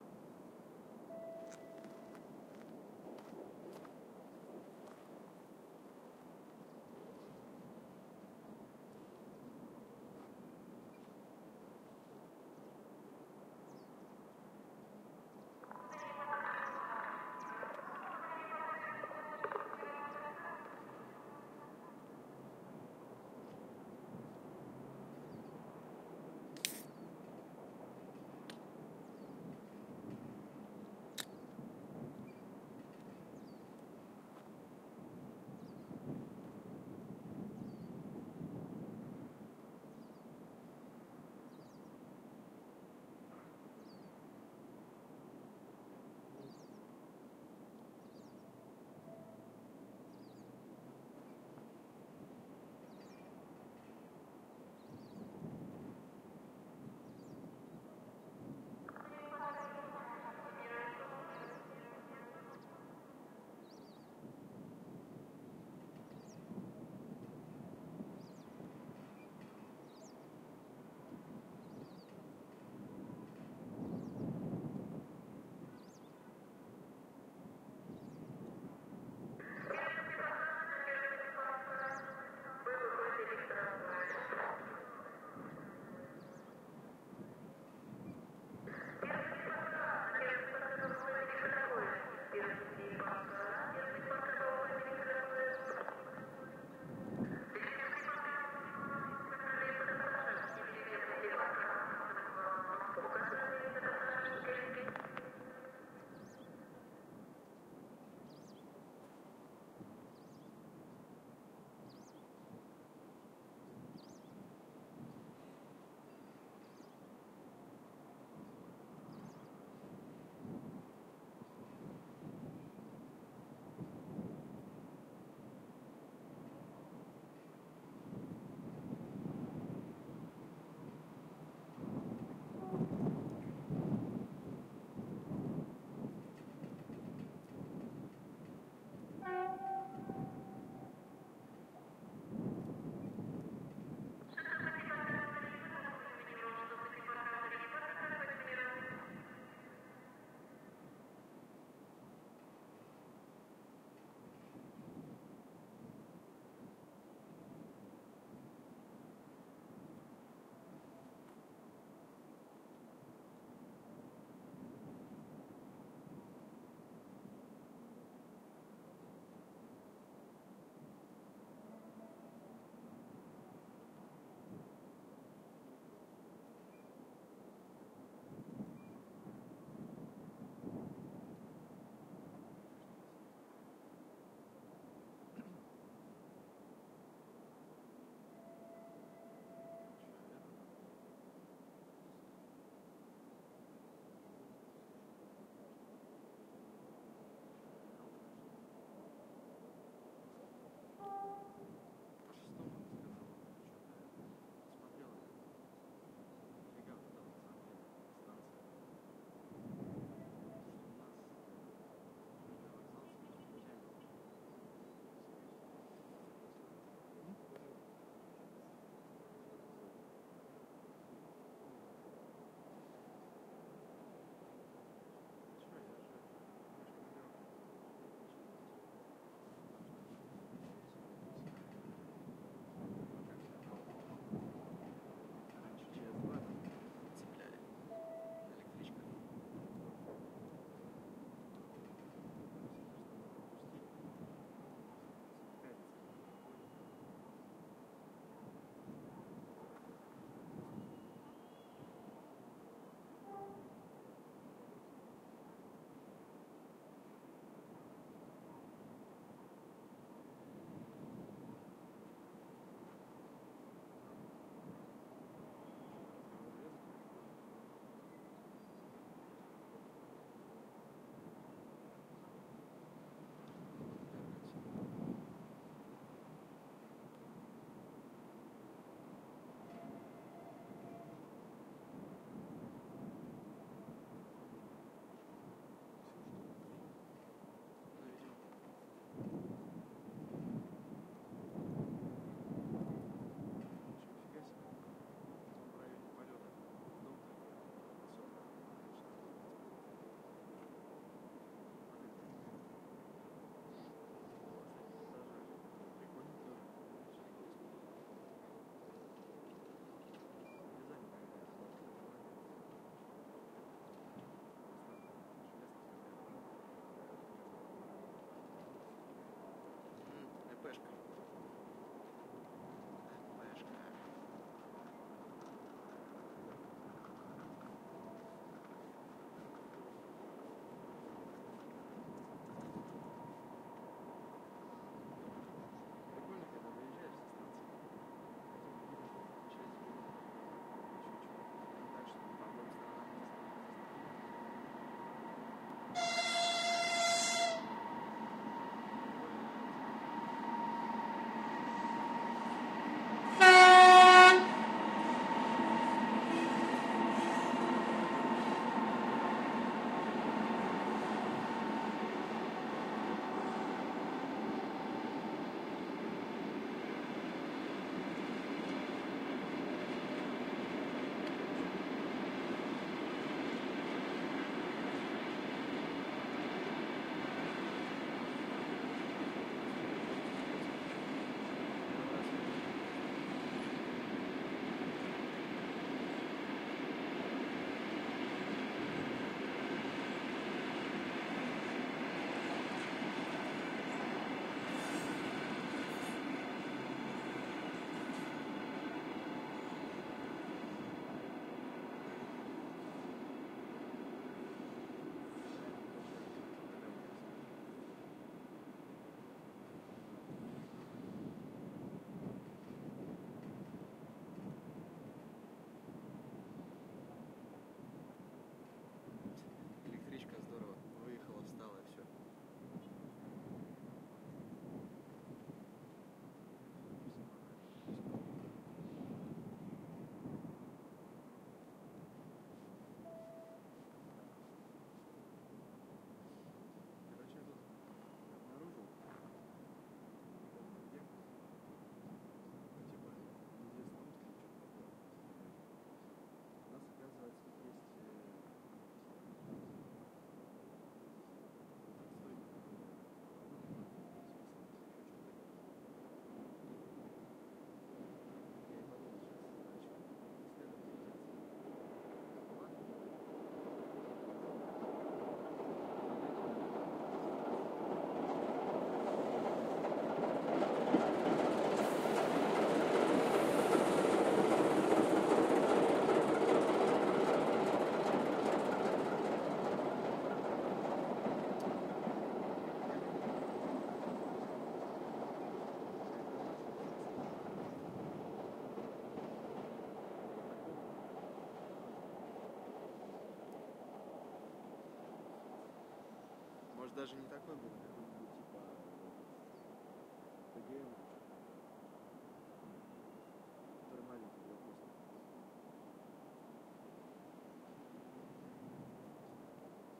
Diesel locomotive. Diesel shutdown.
Recorder: Tascam DR-40
Place: Omsk railway station.
Recorded at 2014-05-02.
locomotive, diesel-locomotive, railroad, field-recording, trains, rail, railway